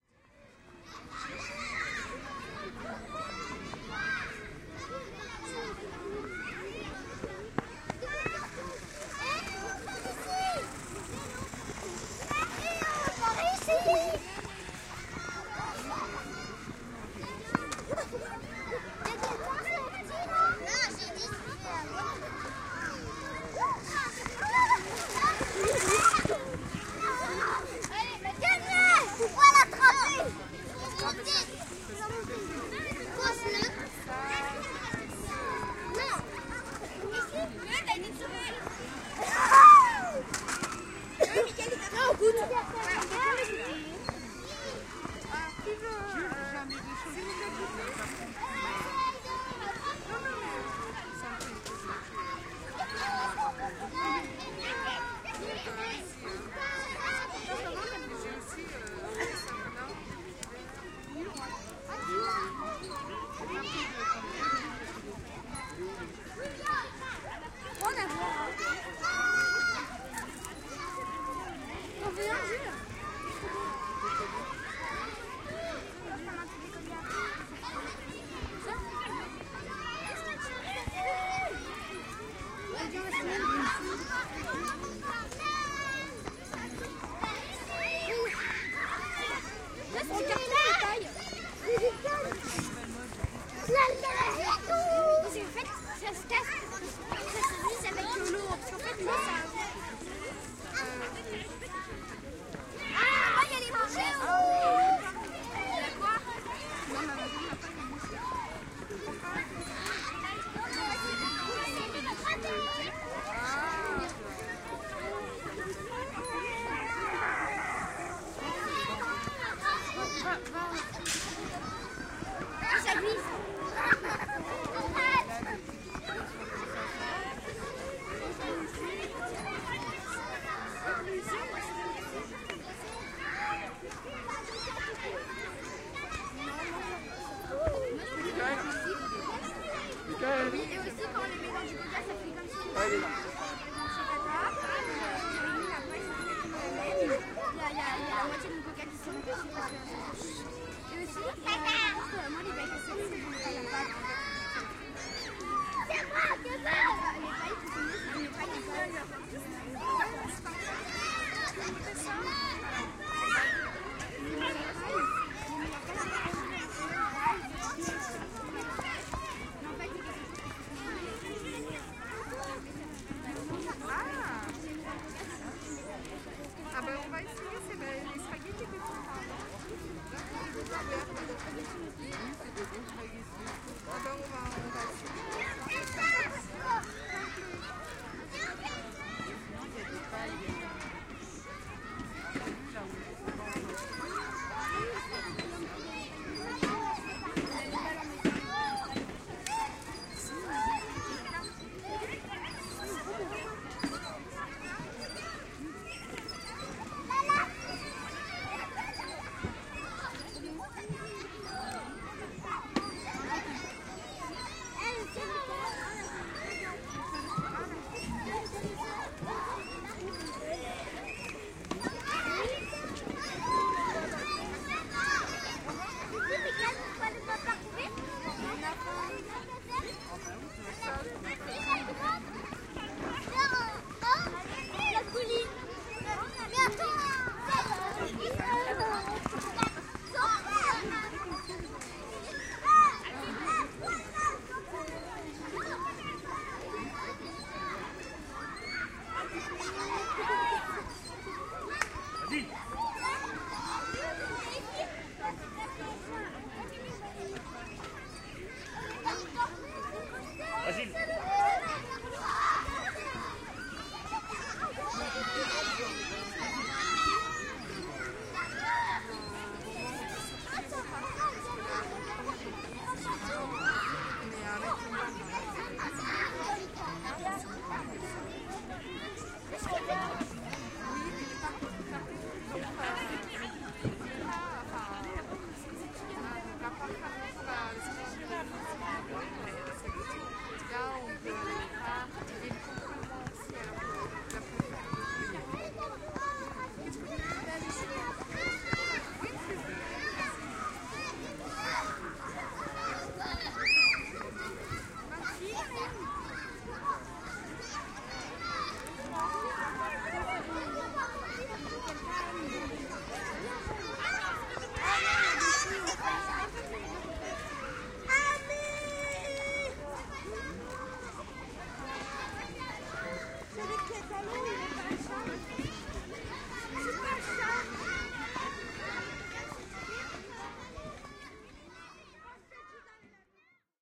201108 1433 FR Playground
Sitting near a playground on Sunday afternoon. (binaural, please use headset for 3D effect)
I made this recording while sitting on a bench, in a public garden, near à playground located in a suburb of Paris (France), during a surprisingly warm Sunday afternoon of November.
In theory, it should be lockdown in France, because of this covide-19 pandemic. But as you can hear, many kids were playing around...
At the beginning of the files, some boys are playing just on the left, with a radio-controlled car. A few meters on the right, two mothers are talking. Then, their children come to them to eat snakes. And in the background, many other kids are playing, running, cycling.
Recorded in November 2020 with an Olympus LS-P4 and Ohrwurm 3D binaural microphones.
Fade in/out and high pass filter at 60Hz -6dB/oct applied in Audacity.
France, binaural, kindergarten, play, voices, kids, running, scream, playground, screaming, games, shout, Sunday, afternoon, playing, field-recording, public-garden, ambience